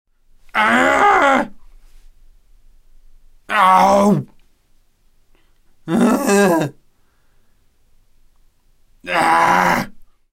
Me grunting in a frustrated way.